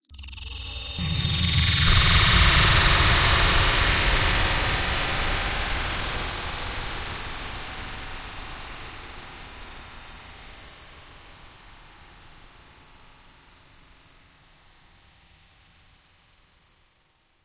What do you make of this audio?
digifishmusic Eastern Whipbird 4X Slower airdigitize-rwrk
remix of "Eastern Whipbird 4X Slower" added by digifishmusic.
slow down, edit, delay, filter, digital reverb
robot, illbient, sky, space, soundtrack, air, score, ambience, effect, ambient, digital, sinister, reverb, abstract, sci-fi, soundesign, pad, cyborg, processed, crash, remix, electro, scary, astral, delay, fx, bird, film, alien, atmosphere